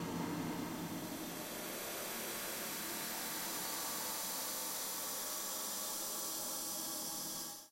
Recorded fan cover sound and stretched in Audacity
Horror, Eerie